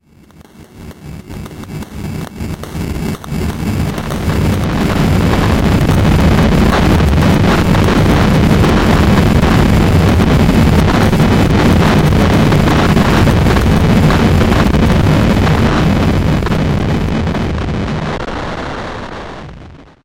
Sound of some kind of digital sonic fiery destruction. Imagin the end of the world through a nuclear explosion. Made using extreme settings on some filters. For the EarthWaterFireWind contest.
armageddon, destruction, digital, fire, nuclear